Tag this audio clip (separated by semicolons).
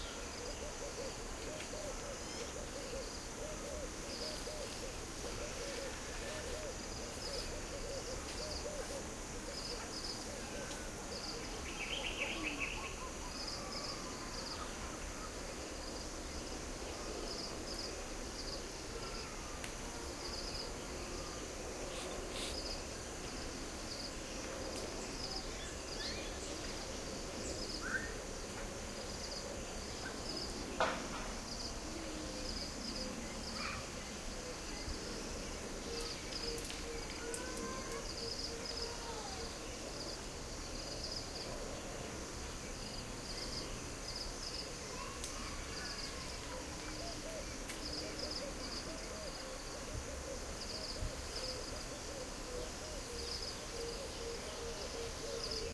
crickets; field; night; Uganda